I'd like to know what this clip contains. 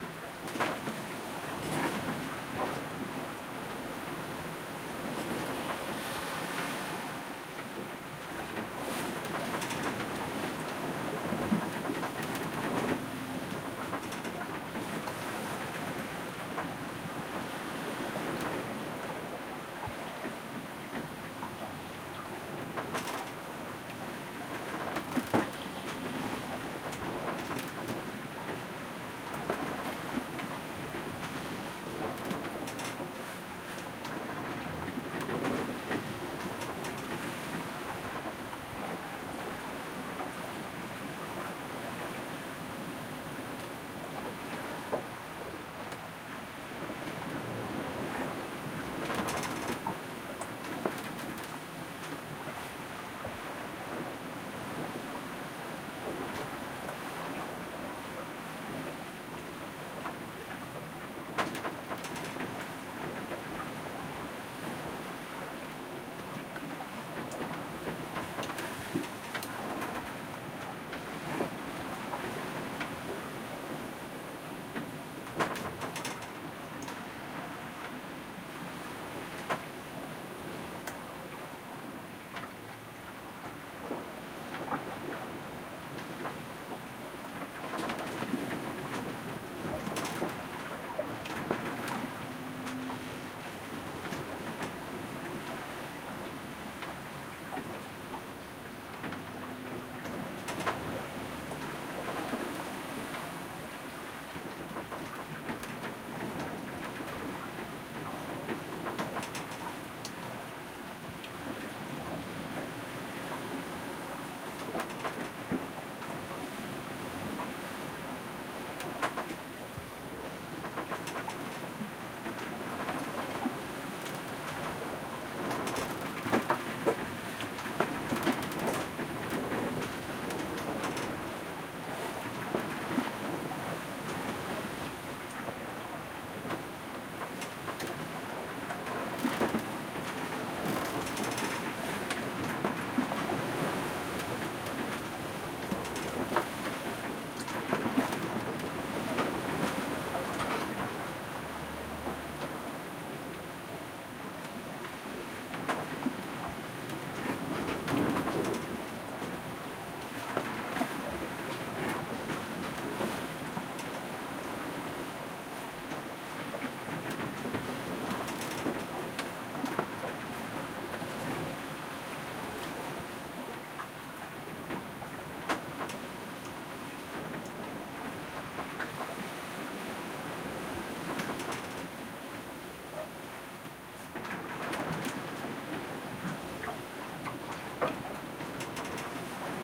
Inside a sailboat (wind speed 17kn)
Sailing from Spain to Canary islands in October with a 12 meter yacht. Wind speed was around 17 knots. I positioned the recorder under deck. Nice creaking :) Recorded with an Olympus LS-12 and a Rycote wind shield.
splash, creak, sail, field-recording, sailing, water, ocean, waves, surf